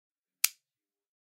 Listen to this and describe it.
The snap sound a tense cable makes when cut.